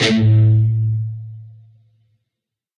Dist Chr A oct pm
A (5th) string open, and the D (4th) string 7th fret. Down strum. Palm muted.